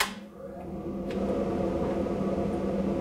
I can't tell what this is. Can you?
Fume extractor - Suomen puhallintehdas oy - Start run
Fume extractor being started.
metalwork, fume, 80bpm, vacuum, 1bar, tools, suction, field-recording